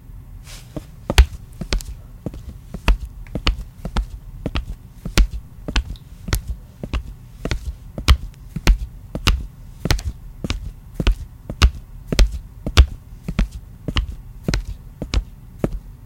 walking footsteps flat shoes tile floor 3
A woman walking in flat shoes (flats) on tile floor. Made with my hands inside shoes in my basement.
female, flat, flats, floor, footsteps, shoes, tile, walking